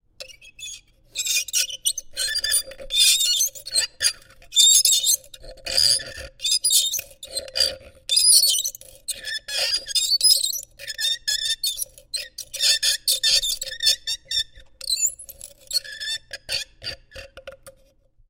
glass creaking
glass scratching against glass for a long eerie noise